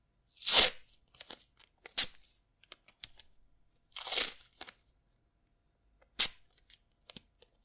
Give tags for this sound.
packaging; ripping; sticking; tape

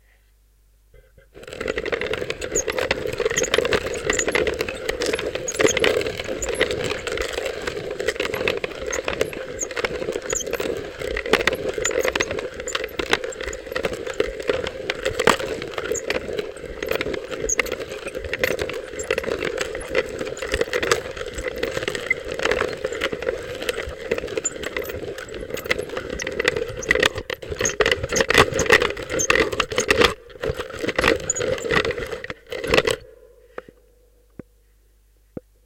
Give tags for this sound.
beans; coffee; cozy; driven; grinding; hand; machine; mill; sound; wooden

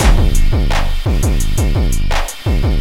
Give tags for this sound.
beats; gangsta; techno

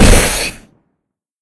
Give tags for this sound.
CAS; Gunship; Jet; Launching; Missile; Plane; Rocket

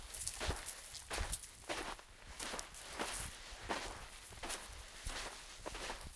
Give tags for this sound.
Walk; Nature

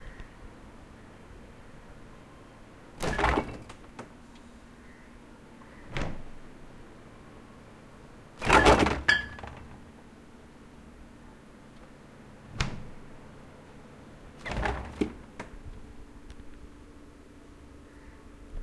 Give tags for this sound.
Freezer; Appliance; Open-close; Door; Fridge

